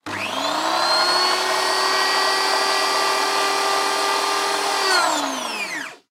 Food processor high speed
Something with a motor